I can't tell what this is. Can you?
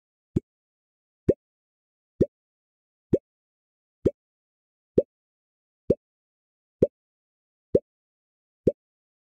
Bloop Jar
Blop, Cartoon, Funny